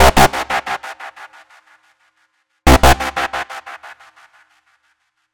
Street Banger
This sound is made by the synth itself
Country, Edge, Electronic, Field, Film, Free, Motorway